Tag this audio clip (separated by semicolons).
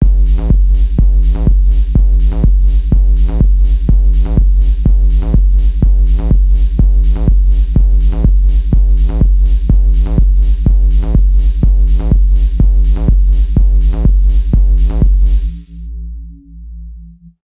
loop; techno; tools